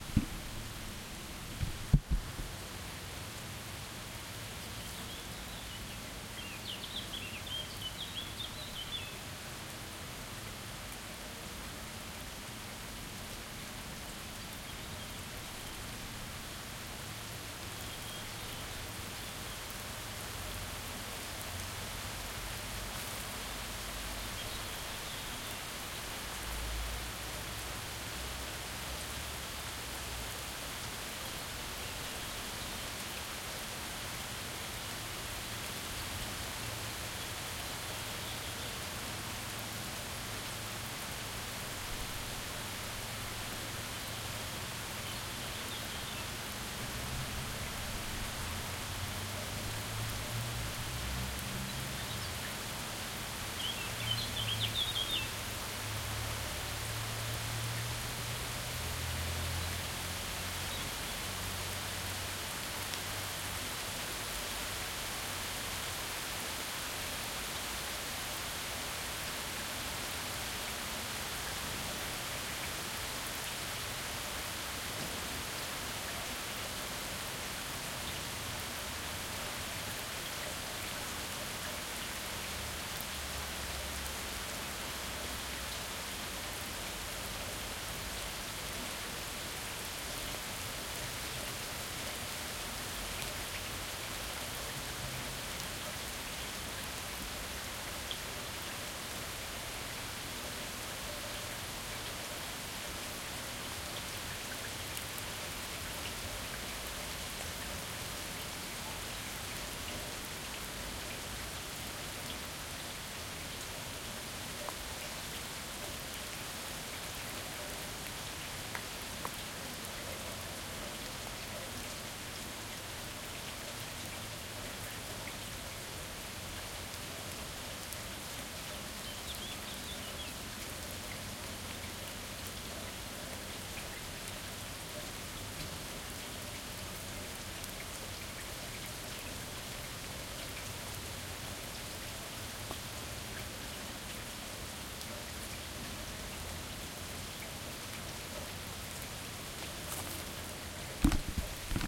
rain medium drain MS
Unprocessed recording of medium intensity rain ambience. Includes sound of water running through drain pipe towards the end of recording.